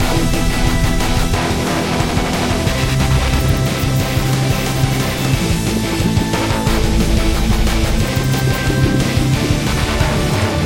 OLD Speedstep I made. It's kinda bad. Loop was created by me with nothing but sequenced instruments within Logic Pro X.
crap, drumstep, dubstep, epic, fast, heavy, loops, music, paced, song